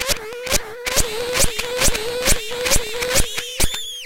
nordy glitch 012
buzz, wave, noise, pop, glitch, click, raw, digital, idm, modular, beep, synth, nord, modulation, boop, fm